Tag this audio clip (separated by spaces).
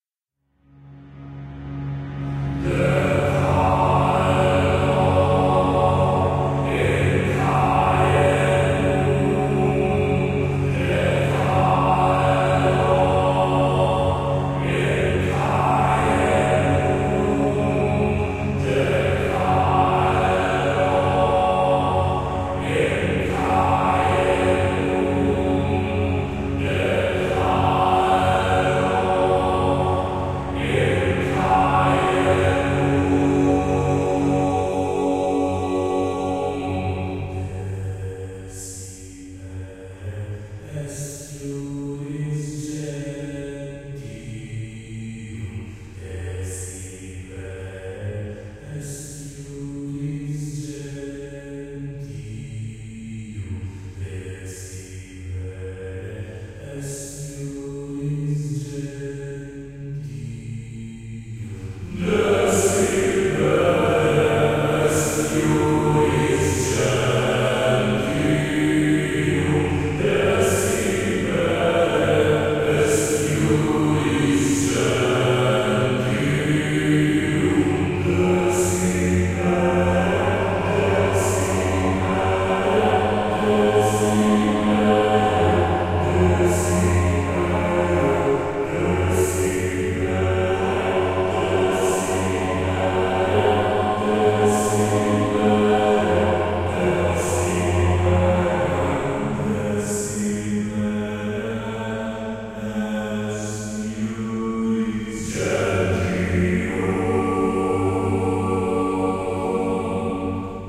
choir dark epic vocals